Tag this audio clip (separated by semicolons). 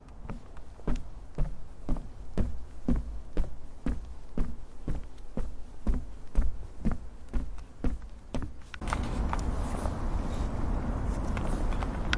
floor; bridge